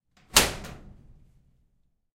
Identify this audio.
Metal Impact 2
The sound of kicking the wall inside a small metal shed.
Recorded using the Zoom H6 XY module.
bang, clang, hit, impact, loud, metal, strike